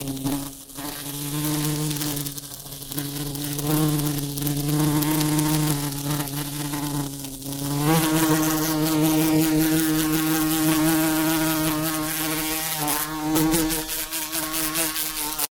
A large bumblebee recorded using a Sony PCM D50 with the built-in mics.
Bumble-bee, Bee, insect